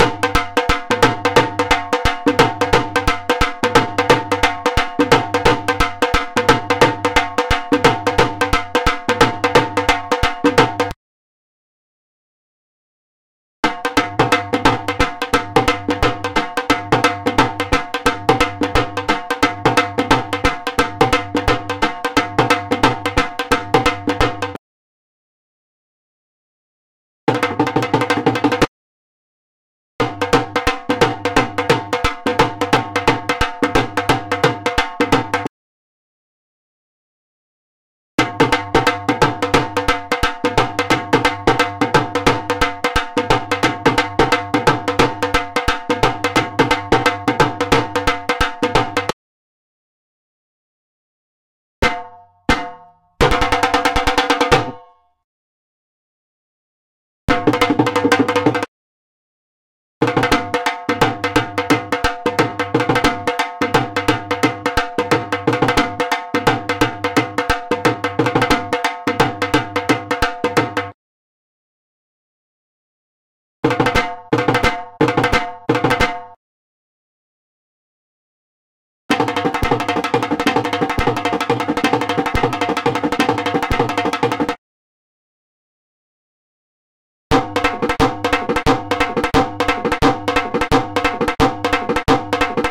DHOL BEAT
Dhol Drum by Johnny Kalsi of The Dhol Foundation.
Enjoy!
Dhol, Drum